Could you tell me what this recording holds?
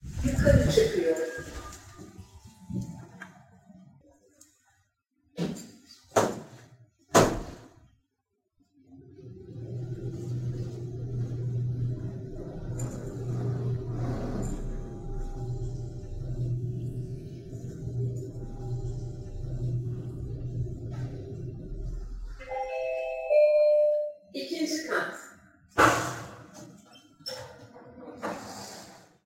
Elevator, second floor
An elevator ride to second floor (to outside) in an Istanbul Metro (Subway).